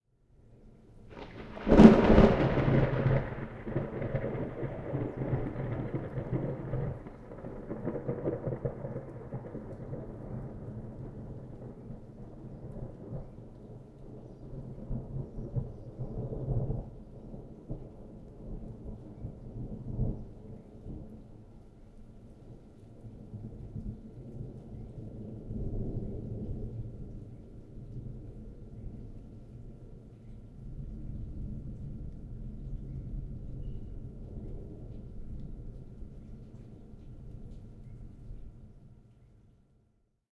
A close, intense lightning strike.
Recorded with a Zoom H1.
intense, thunder